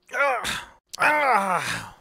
Two recordings of a man groaning. I tried minimizing room tone as much as possible. Recorded on a Shure Beta58 from about 18 inches away.